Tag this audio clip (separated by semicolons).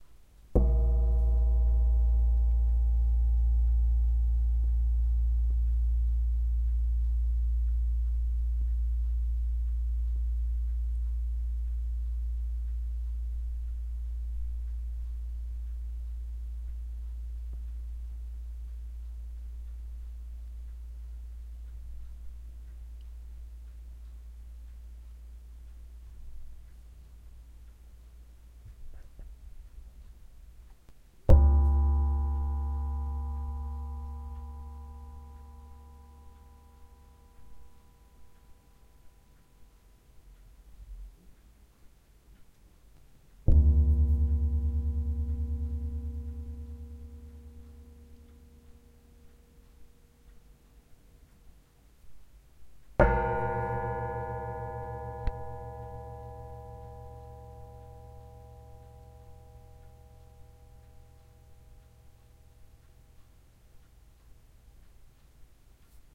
metal; sheet; sheet-pan; gong; baking-sheet; percussion; baking-tray